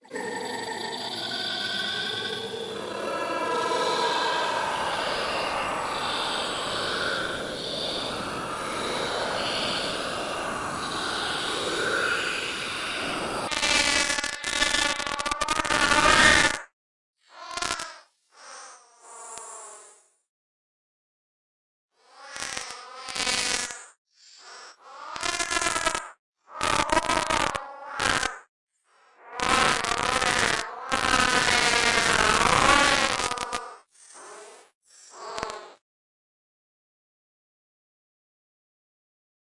I think I made this with my voice and a Kaoss Pad. I really don't remember though.